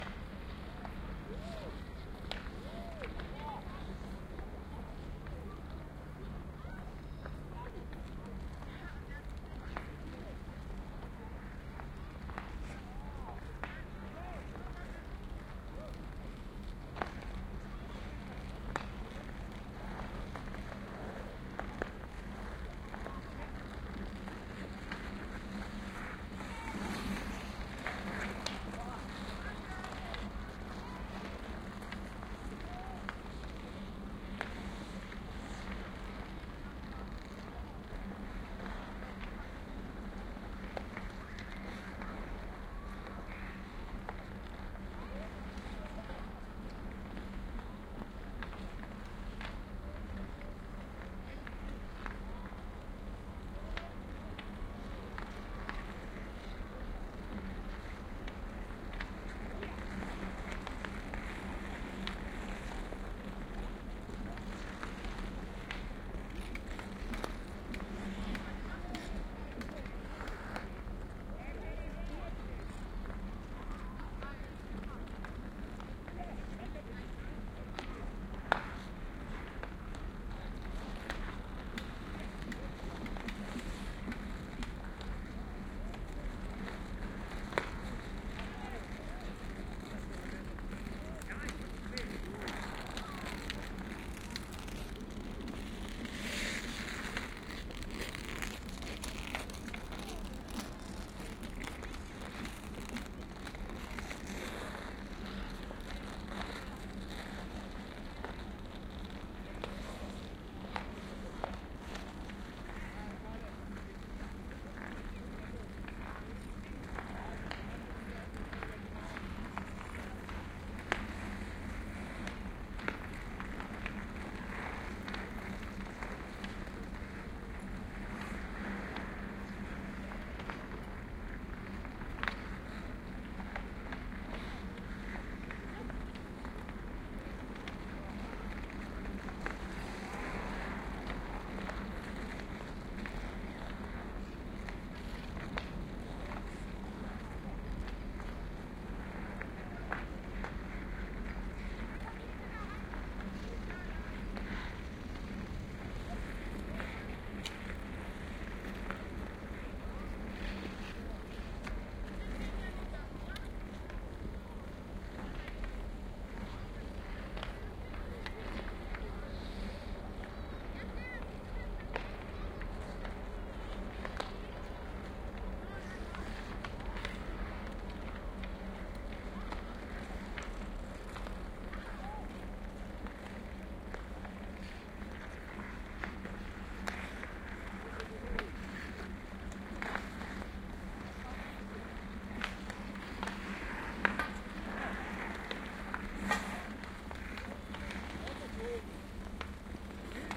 ice skating
When the lakes freeze over in winter a lot of people use this for skating on the ice. You can hear people doing just that and some other playing ice hockey. Recorded with the OKM microphones with the A3 adapter into R-09 HR.